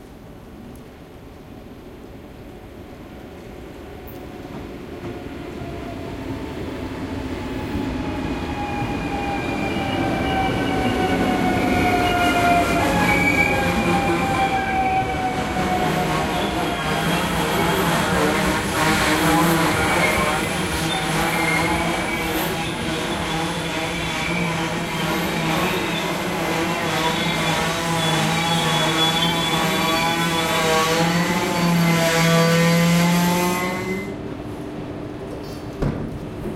A train approaching a small station, somewhere in The Netherlands.
Train is coming from the left and slowing down and braking to come to a hold on the right.
Recorded with a ZOOM H2N.